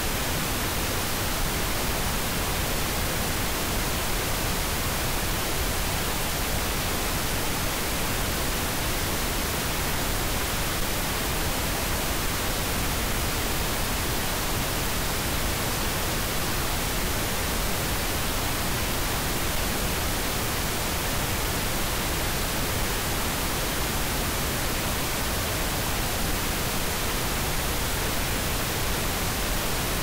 Pink Noise -20dBFS 30 Second
30 seconds of pink noise at -20dBFS
SECONDS, PINK, 30, NOISE